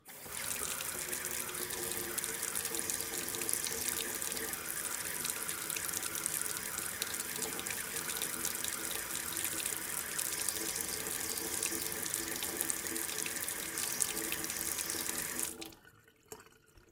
Water Kitchen Sink Running Tap Cold 01
Running water from cold tap into sink. Not filling sink.
Sink, Tap, Kitchen, Running-Water, Household, Water